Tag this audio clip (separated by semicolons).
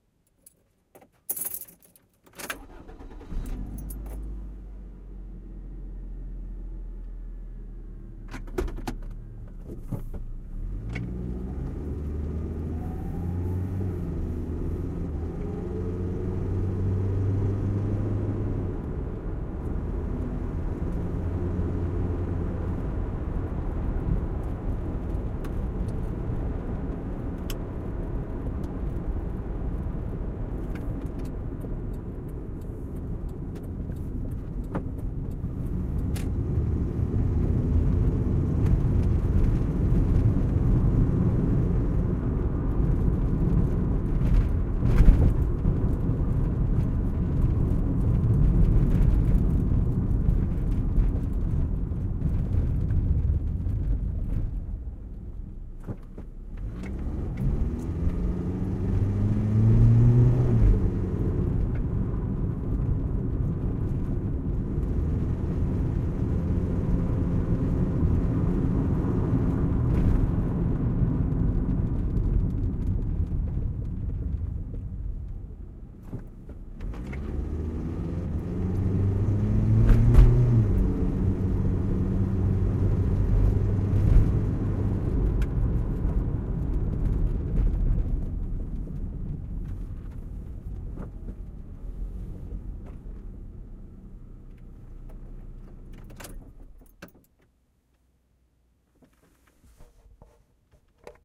inside; bumpy; interior; nasty; rigid; crappy; sound; accelerating; car; binaural